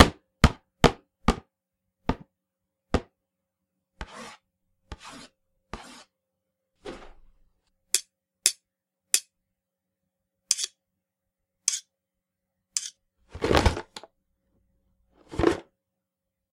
Impacts, Scrapes, Falling Box Of Stuff
Impacts of wood/cardboard, metal and scraping. I also drop a wooden box full of old computer parts.
Equipment used: Audio-Technica ATR2100-USB
Software used: Audacity 2.0.5